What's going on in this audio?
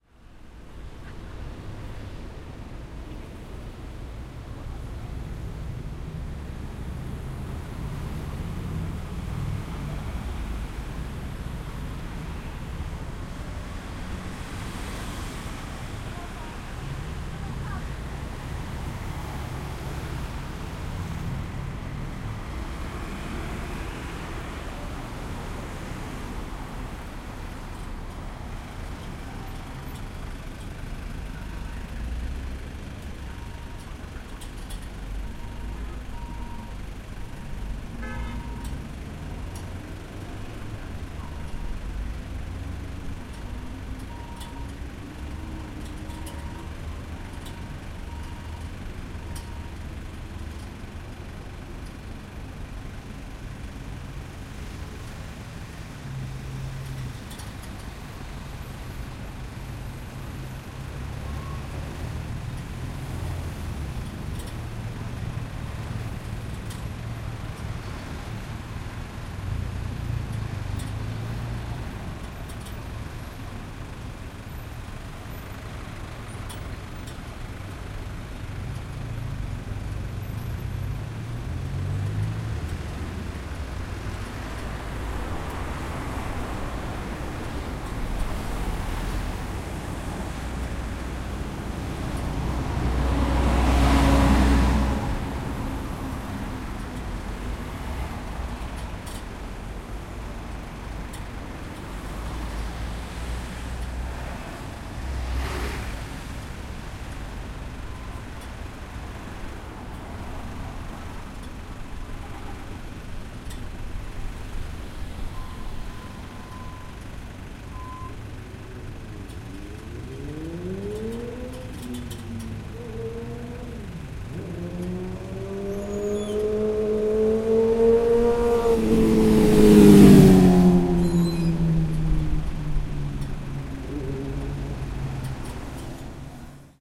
0318 Crossroads Itaewon
Traffic in a crossroads and waiting in a traffic light. Mini truck engine. Alarm from the traffic light.
20120620
alarm, engine, field-recording, korea, motorbike, seoul, traffic, truck